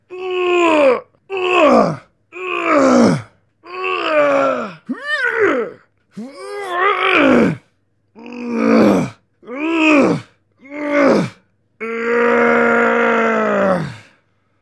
Man grunt, struggling 2
Man grunt, struggling
What else can I say? :D